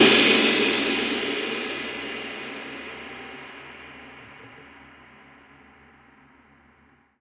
07 Crash Loud Cymbals & Snares
click, crash, custom, cymbal, cymbals, drum, drumset, hi-hat, Maple, metronome, Oak, one, one-shot, ride, Rosewood, shot, snare, sticks, turkish